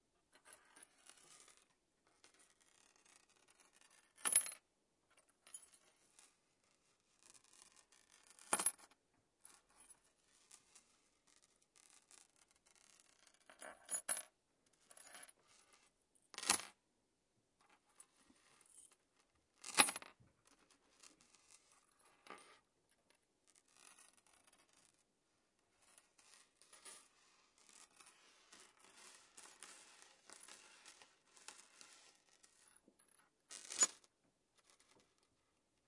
mySound Piramide Arbër
Sounds from objects that are beloved to the participants pupils at the Piramide school, Ghent. The source of the sounds has to be guessed
mySound-Arbr, ratling-metal-chain